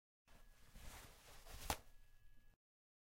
unroll and rip toilet paper.
unroll, paper, rip, toilet